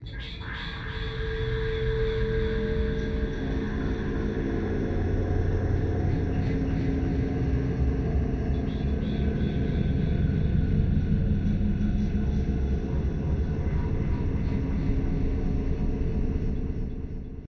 ab futurecity atmos
sounds like a industrial futuristic city
atmospheres, drone, freaky, horror, pad, sound